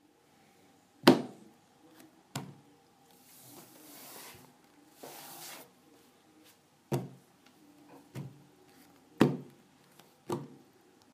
Poner manos en mesa de madera
Table, Wood, Hands